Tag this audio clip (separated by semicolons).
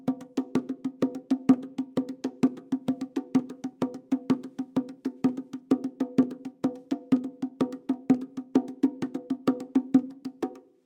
bongo; drum; percussion